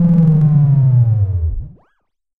Attack Zound-07
Similar to "Attack Zound-04" but with a longer decay and something weird at the end. This sound was created using the Waldorf Attack VSTi within Cubase SX.